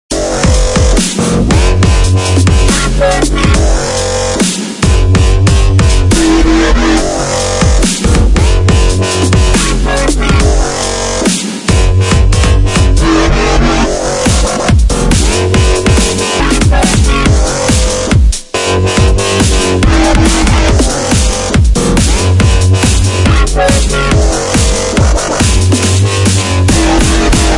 Dubstep Loop 1
snare, hv, bass-music, bass, hats, electronic, dubstep, kick, loop, fruity-loops